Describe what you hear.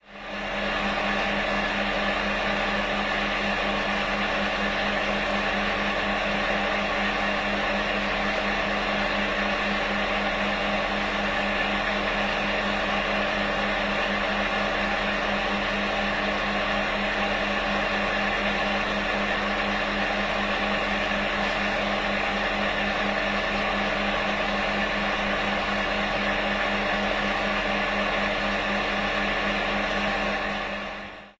16.10.10: the sound of a little red heater I use to warm up myself. my office.during of the PhD defence preparations.
Poznan, Poland
flat, winter, home, noise, heater